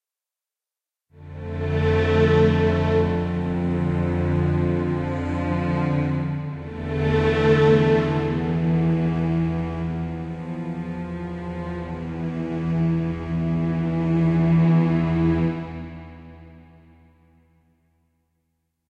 cinematic vio2
made with vst instruments by Hörspiel-Werkstatt Bad Hersfeld
ambience, ambient, atmosphere, background, background-sound, cinematic, dark, deep, drama, dramatic, drone, film, hollywood, horror, mood, movie, music, pad, scary, sci-fi, soundscape, space, spooky, suspense, thrill, thriller, trailer